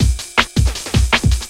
duppyD+B01 160bpm
Drum n Bass style medium light weight beat with radio processing effect.
160bpm, bass, beat, break, breakbeat, drum, loop, n, processed, radio